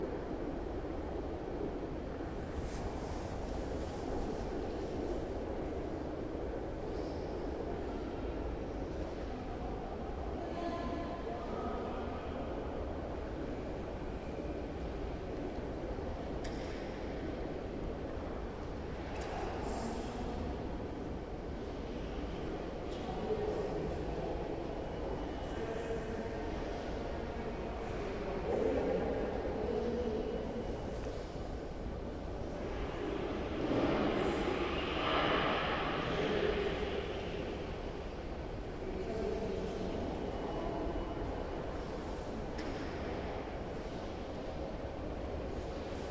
mbkl entrance wide
ambient recording of the main foyer of the "museum der bildenden künste" (museum of art) in leipzig/germany, taken from the gallery above the foyer, about 15 meters above ground level.voices of visitors.this file is part of the sample-pack "muzeum"recording was conducted with a zoom h2 with the internal mics set to 90° dispersion.
ambient, city, field-recording, hall, indoor, large-room, museum, people, wide